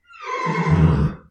Horse Small Whinny
Field recording of a thoroughbred horse whinnying to respond to another horse, mic is in wood stall with metal roof.